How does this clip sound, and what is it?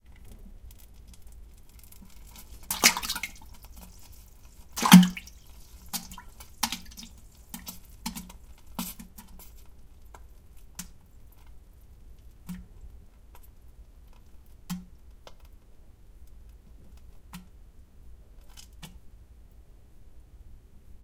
Taking a shit.
and in the included documentation (e.g. video text description with clickable links, website of video games, etc.).